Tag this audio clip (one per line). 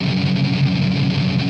160bpm
distortion
drop-d
f
guitar
les-paul
loop
muted
power-chord
strumming